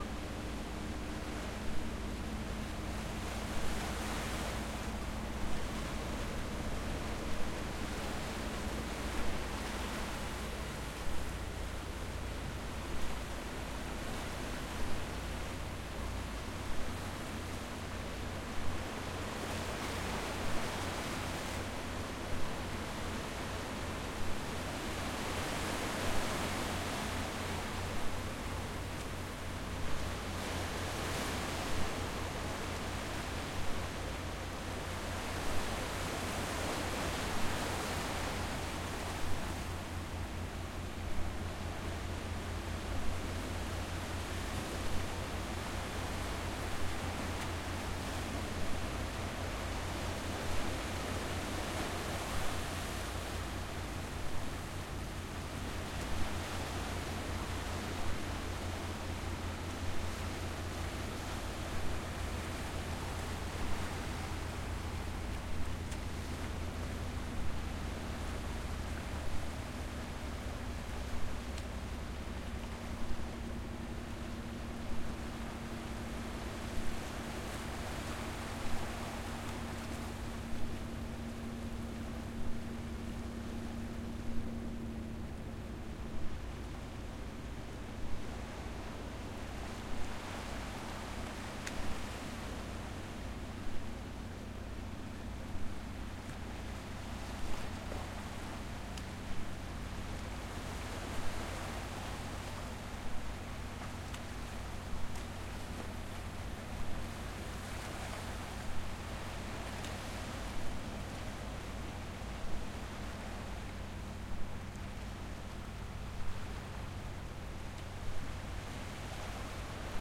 06 Lanes Island Water MotorInBG 48 24
Ocean water on the rocks of the Maine coast, with the low sound of a fishing boat motor in the background.
h4n, ocean, rocks, maine, nature, boat, water, island, motor